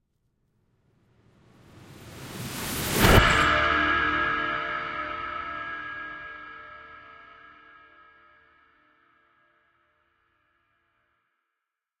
Trailer hit 8
Industrial Sounds M/S Recording --> The recorded audio is processed in logic by using different FX like (reverse/reverb/delay/all kinds of phasing stuff)
Enjoy!
sound, hits, effect, garage, design, film, boom, fx, effects, woosh, cinema